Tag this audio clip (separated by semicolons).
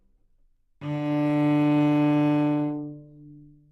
single-note; cello; multisample; neumann-U87; good-sounds; D3